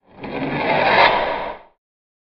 Slowed down fork scrape on a plastic chair.
Scrape, MTC500-M002-s14, Fork